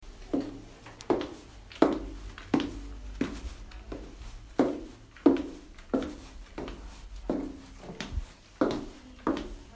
Me walking on heels.
Walking, Women